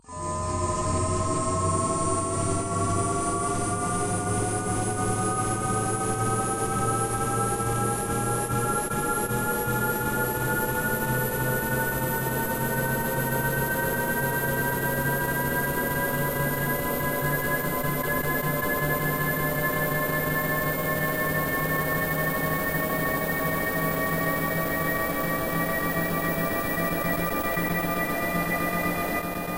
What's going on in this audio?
Sci Fi Machine Spin Up 0
AUDACITY
Stereo channel:
- Cut section from 5.439s to 6.175s
- Effect→Fade In 0.000s (start) to 0.076s (end)
- Effect→Fade Out 0.662s to 0.736s (end)
- Effect→Repeat…
Number of repeats to add: 120
- Tracks→Add New Stereo Track
- Copy complete first track and paste in second track shift forward at 0.395s
- Effect→Sliding Time Scale/Pitch Shift (both tracks)
Initial Tempo Change: –90
Final Tempo Change: 500
Initial Pitch Shift: -12
Final Pitch Shift: 0
motor, sci-fi, ignition, machine, power